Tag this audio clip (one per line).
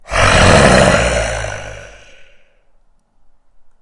Creature
Growl
Roar
Zombie